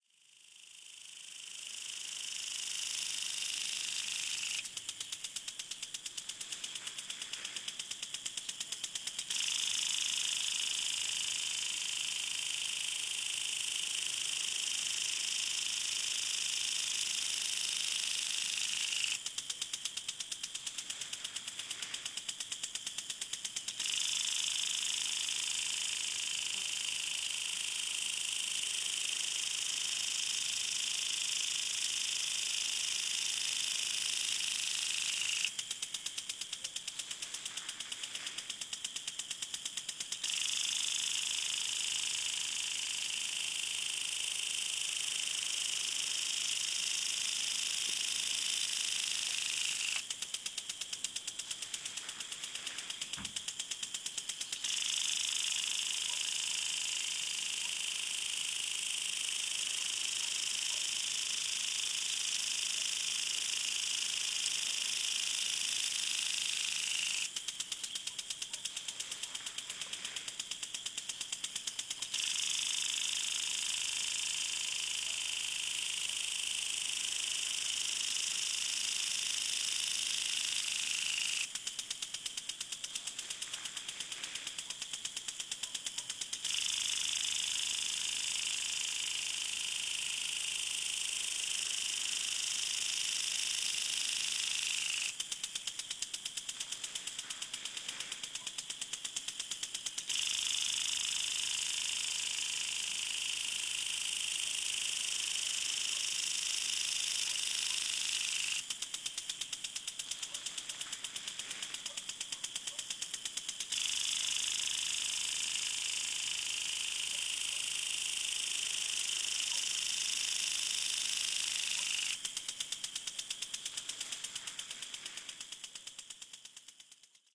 20160720 home.lawn.sprinkler.57
Noise of a home lawn sprinkler, medium distance take. Recorded near Madrigal de la Vera (Cáceres Province, Spain) using Audiotechnica BP4025 > Shure FP24 preamp > Tascam DR-60D MkII recorder.